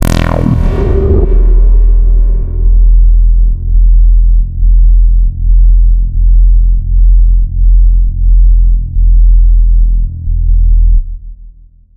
ab pulse atmos
a bass hit with pulsing effect
experimental, ambient, horror, pad, drone, freaky, soundscape, atmospheres, sound, evolving